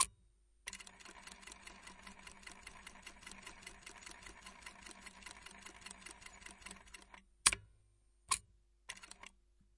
Queneau machine à coudre 38
son de machine à coudre
industrial, coudre, machine, POWER, machinery